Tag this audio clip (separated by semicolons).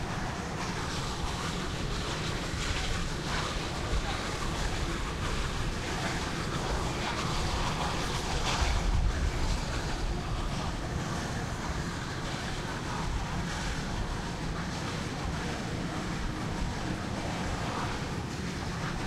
steam; train; loop; locomotive